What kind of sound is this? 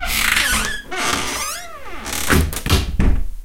creak cabinet door
The creak of the cabinet door.
door, wood, wooden, squeaky, creak, opening, open, close, cacophonous, squeak